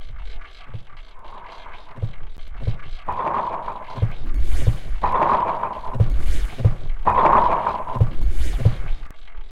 LucieMénétrier 2013 Pitter Patter
I changed the speed of a recording and added Echo and Wahwah to make it sound like hearbeats. (These sounds are extracted from personal recordings)